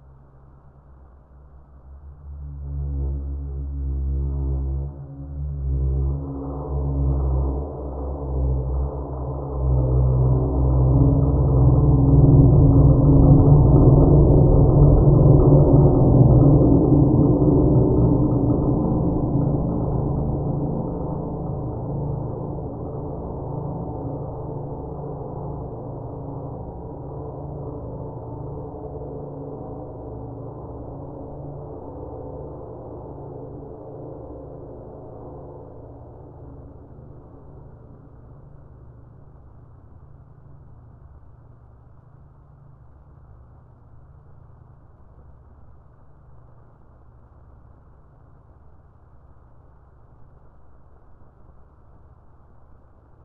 cymbal lo01
A few very strange tracks, from a down-pitched cymbal.
horror, noise, strange, processed, ambience, scream, cymbal, low